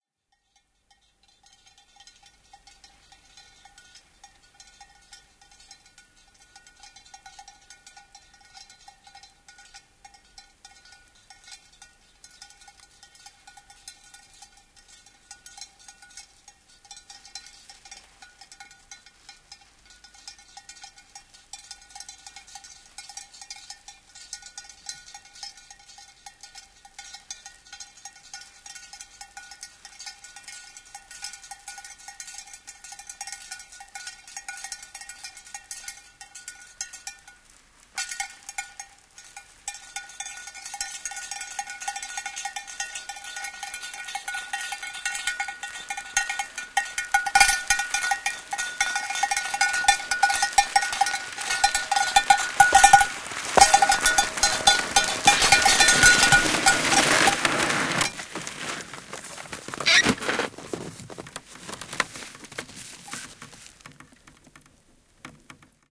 Pororeki ja kello, tulo / Reindeer, sledge, sleigh-bell, approaching at a trot in a frost with the bell clanging, stopping, big freeze
Poro ja reki lähestyy ravia ja pysähtyy kohdalle kovassa pakkasessa kello soiden.
Paikka/Place: Suomi / Finland / Inari, Lisma
Aika/Date: 13.01.1977
Aisakello, Christmas, Field-Recording, Finland, Finnish-Broadcasting-Company, Freeze, Frost, Joulu, Lapland, Lappi, Lumi, Pakkanen, Sleigh-Bell, Snow, Soundfx, Suomi, Talvi, Tehosteet, Winter, Yle, Yleisradio